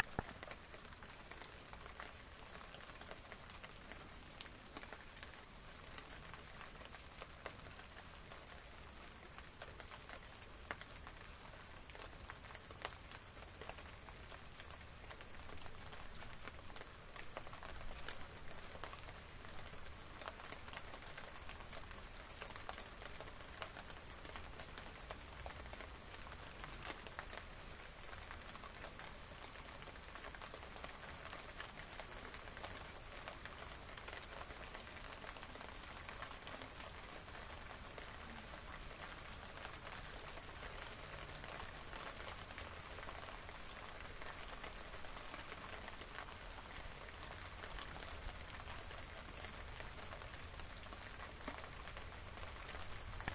Recorded rain in the evening.